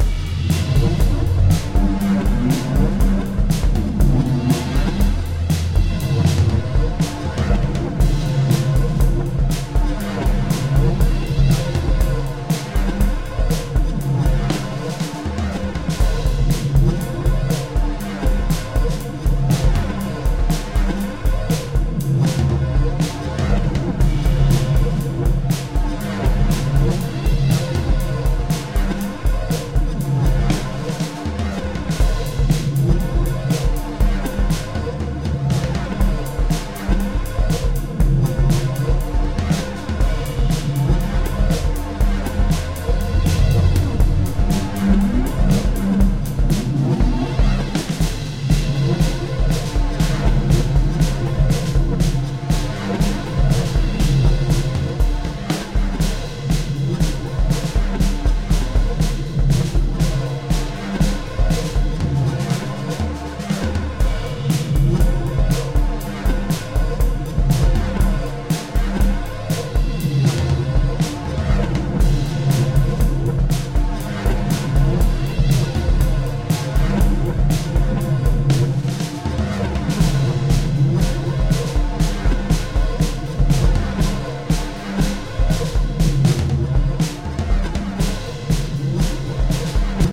Riding on the highway around the city.
Made with Vogue MK2 synth and Garageband.